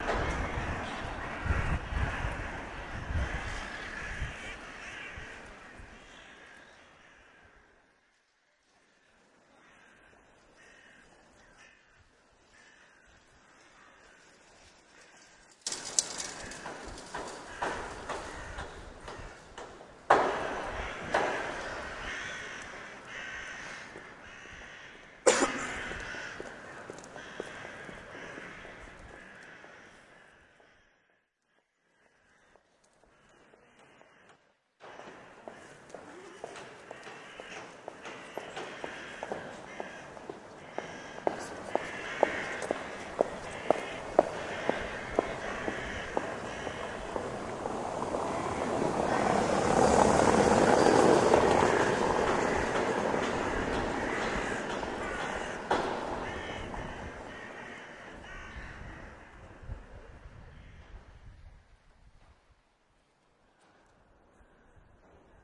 Streets of Riga, Latvia. People passing by
street sounds in Riga
by, latvia, passing, people, riga, street